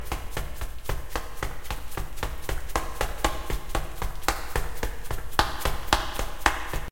Running in a hall
horror, steps, footsteps, foot, hurry, run, loop, footstep, hall, running